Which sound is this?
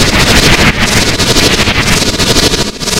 These samples were cut from a longer noise track made in Glitchmachines Quadrant, a virtual modular plugin. They were further edited with various effects.